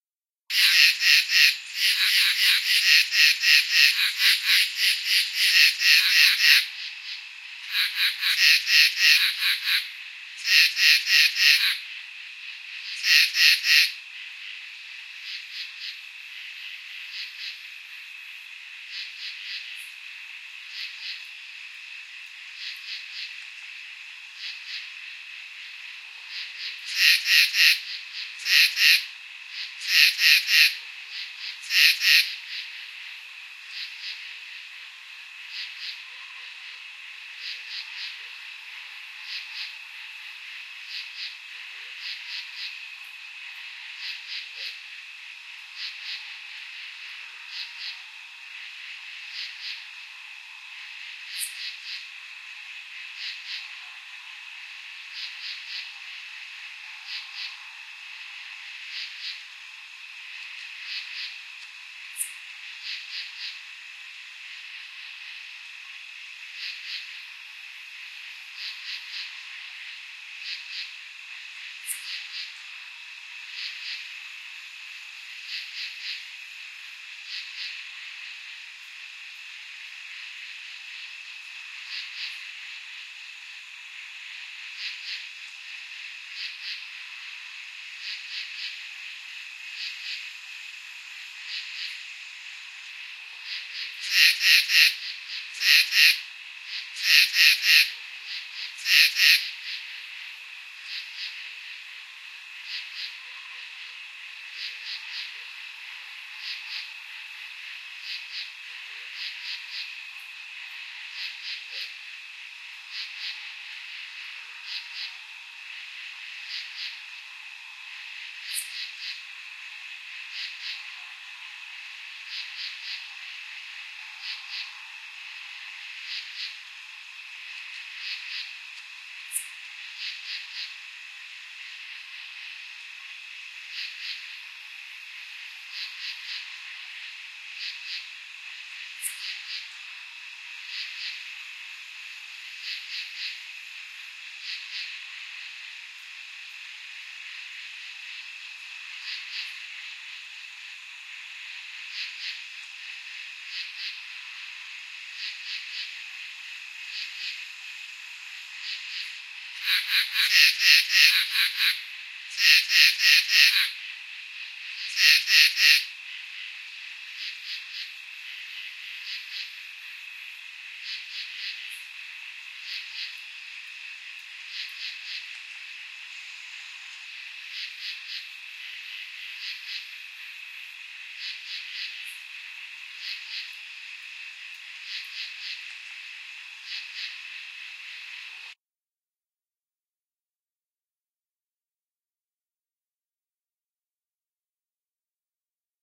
Insects and frogs at night
Sounds of tree frogs and insects at midnight in a remote wooded area, recorded with a Sony HiMD MiniDisc recorder using a Rode NT-4 stereo mic with a Rycote windscreen.
ambience, cricket, field-recording, forest, insects, nature, night, summer, tree-frog, woods